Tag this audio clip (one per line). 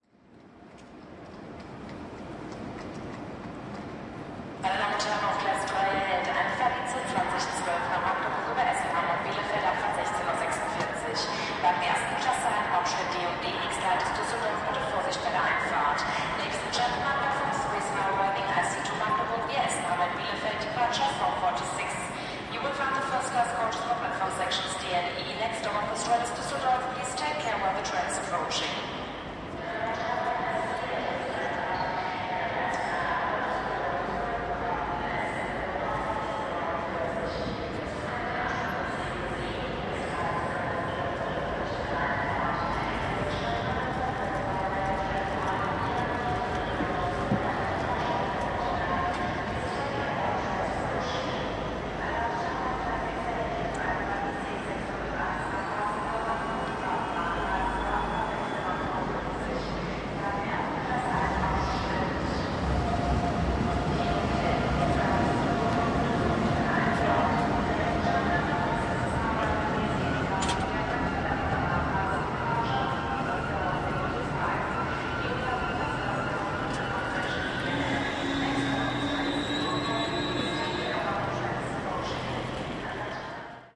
announcement
station
train